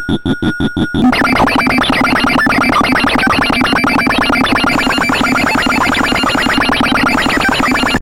Glitch Beat 2
experimental, circuit-bent, glitch, murderbreak, core, rythmic-distortion, coleco, just-plain-mental, bending